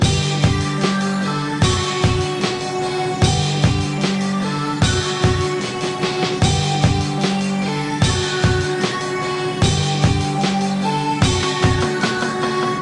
Loop created with Voyetra Record Producer with sounds from my Valdo Drums and guitar sample packs. Tempo is 150 BPM
150bpm, bass, drum, keyboard, loop